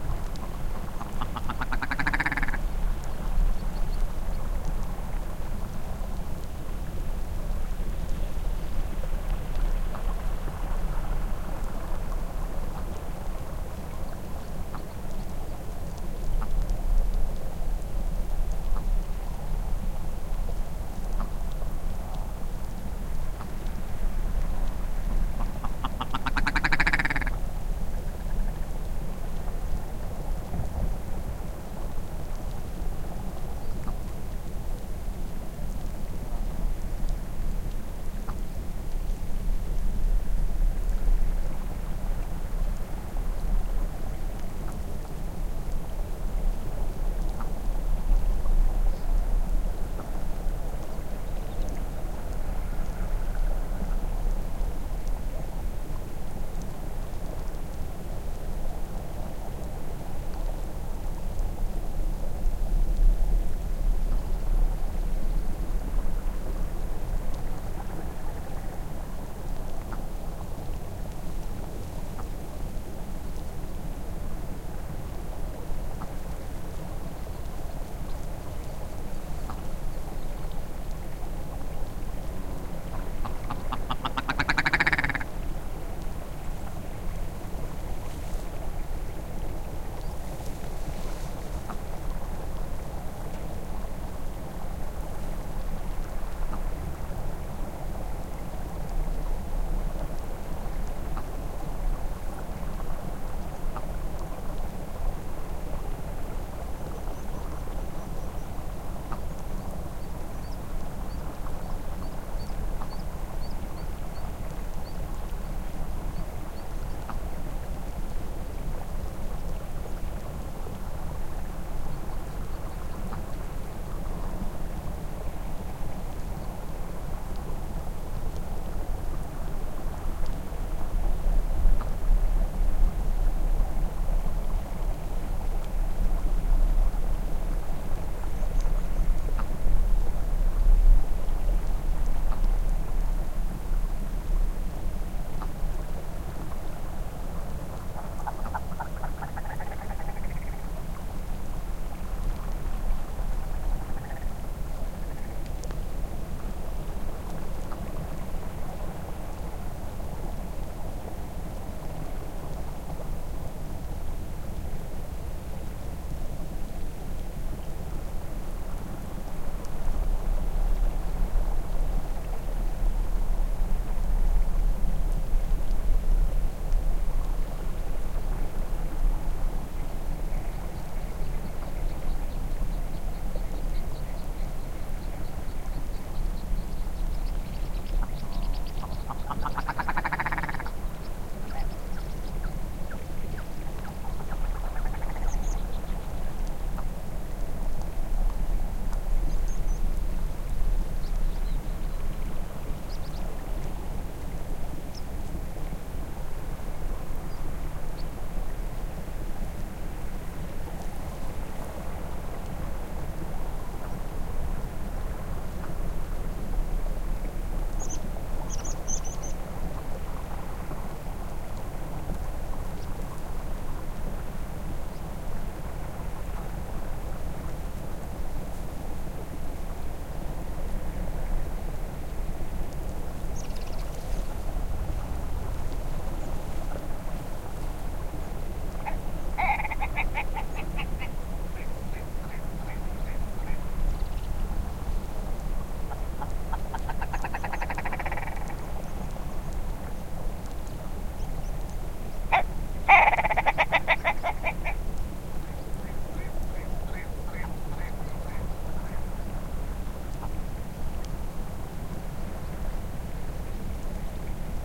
A recording of some black grouses (Tetrao tetrix) in the scottish highlands. NT1A microphones, FP24 preamp into MR2 recorder.
To see a picture of the setup, click on the following link:
Black-Grouse, highland, scottish, heather, scotland, flickr, field-recording, Grouse